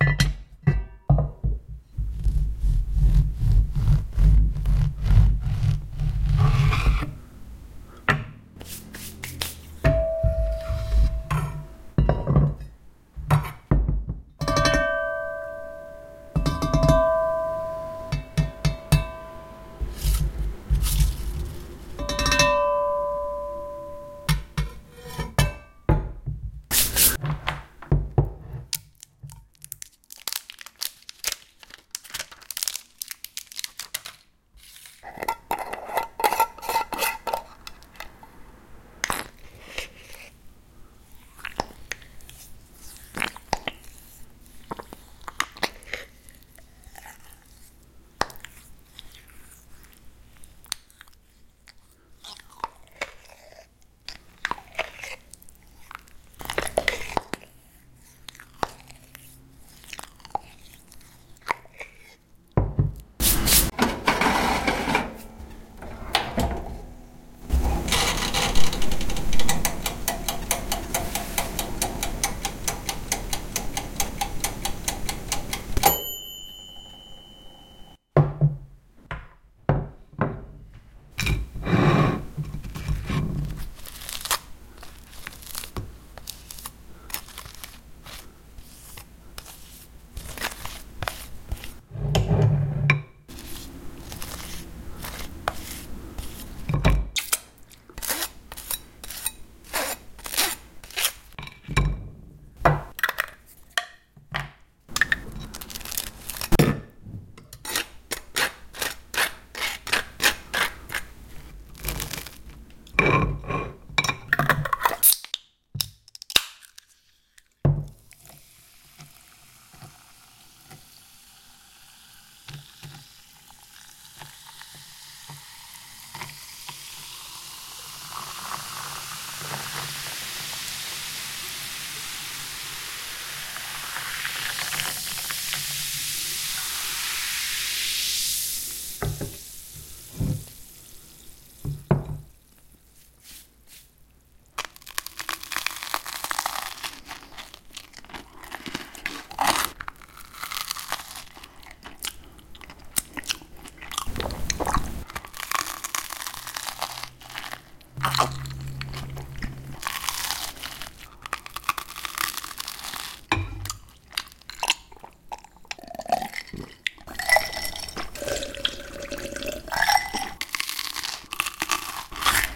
PBJ Morphagene Reel
Created and formatted for use in the Make Noise Morphagene by Walker Farrell